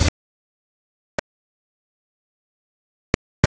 A series of nondescript samples recorded in a fashion inspired by error. They are made so that even if they are arranged in a rhymthmicaly correct order, they still sound horrible. The samples come from unearthed past tracks.
processed, experimental, drum-hit, cuts, arrhythmic, difficult, sampled, idm, clip